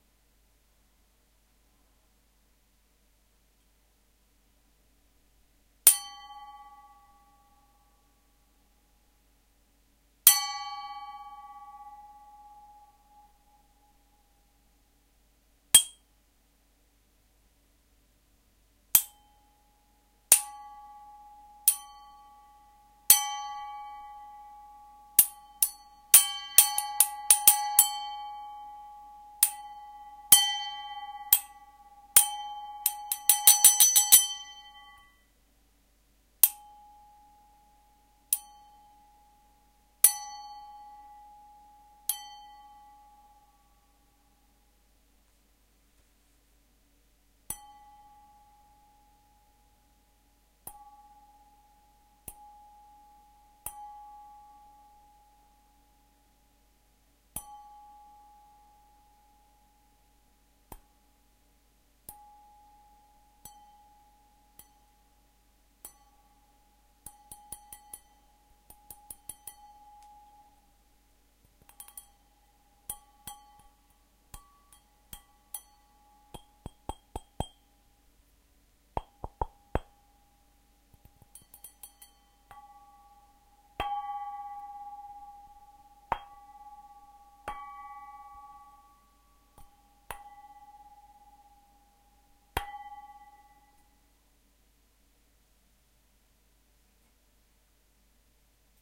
MR Glass and Fingers
Several plings on a glass with the finger and with a spoon, filled with water on different levels.
Nice for percussions.
hit, water, glass, finger